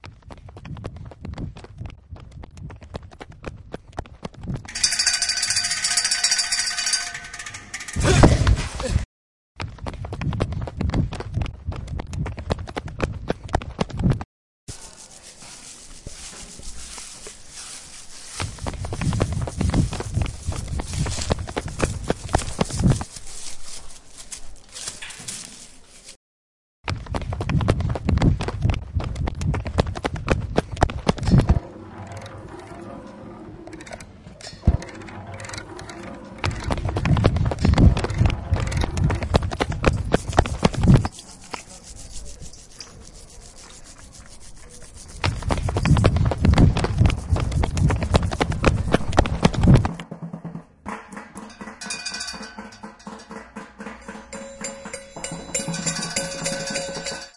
soundscape WB wispelberg2
wispelberg, cityrings, ghent, soundscape